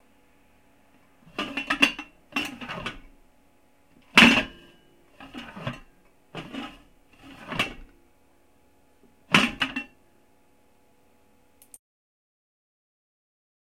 cover sound
A cover :stew pan.
Use this sound like the opening of diver helmet.